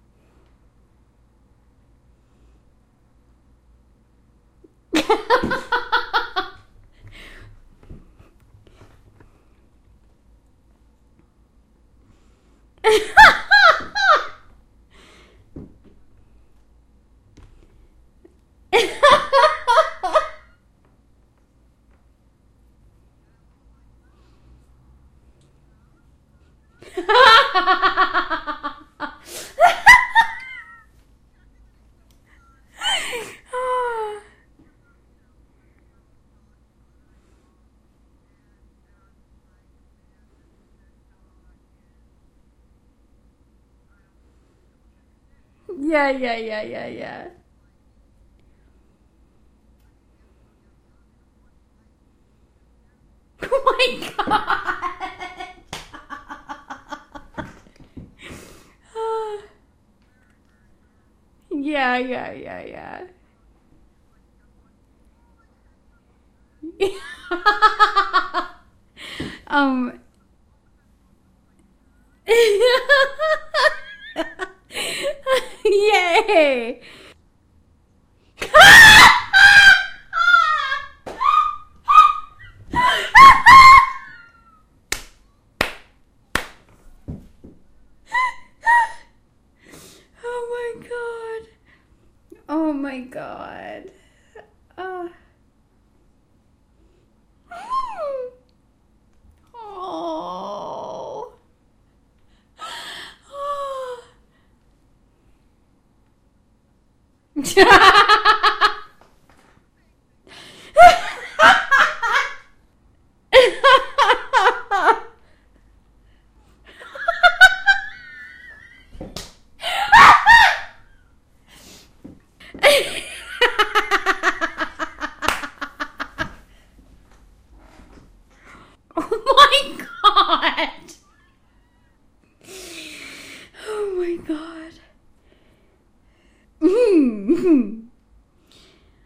sage laughing 053112
Real laughter recorded during a remote panel game show.
laughing, woman, foley, laugh, female